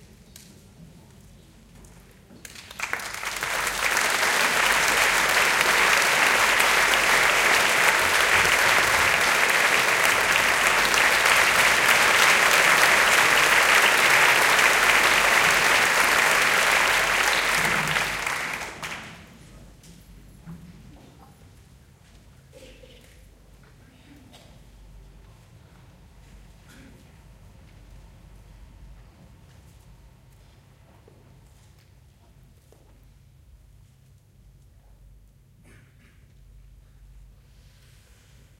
Short Occurs applause just before concert
applause, occurs